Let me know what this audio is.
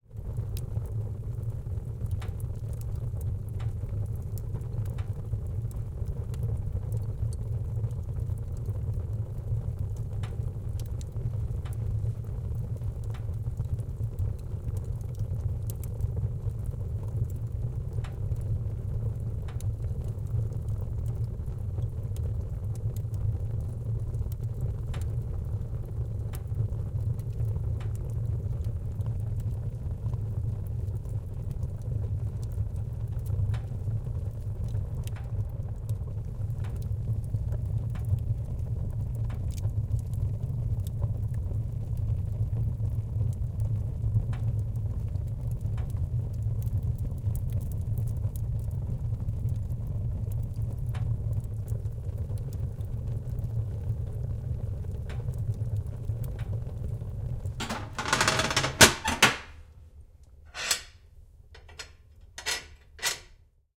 foley,fireplace,burning,crackling,wood,flame,expanding,heated,metal,tension 01 M10
Sound of a heated metal fireplace making expansion torsion sounds. Subtle metal hit sound.